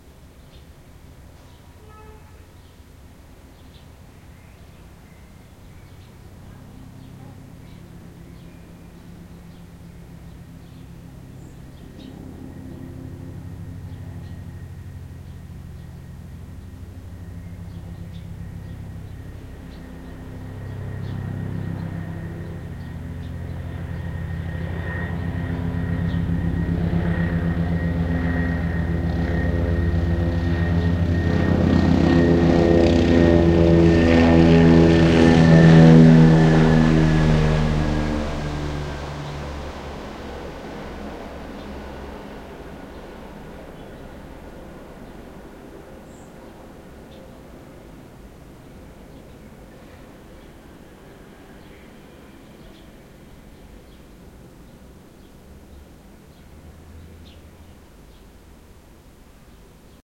A helicopter passes above my house around five o'clock p.m. on the sixteenth of June 2007 in Amsterdam. Recorded with an Edirol cs-15 mic plugged into an Edirool R09,
street, noise, engine, field-recording, helicopter